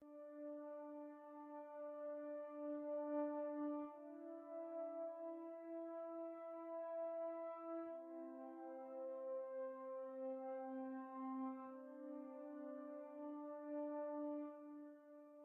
Space Simple
Created with Music Forge Project Library
Exported from FL Studio 11 (Fruity Edition)
Library:
Patcher>Theme>Space>Ambiance>Simple
Ambiance, MFP, Music-Forge-Project, Simple, Space